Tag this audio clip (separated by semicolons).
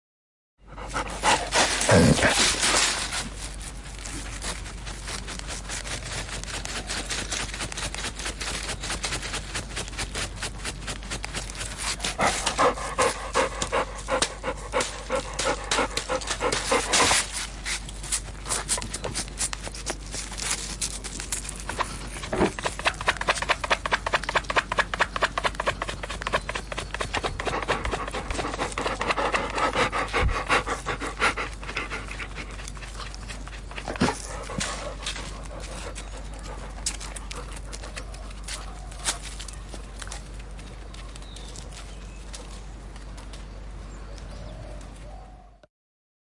Dog; Field-Recording; Scratching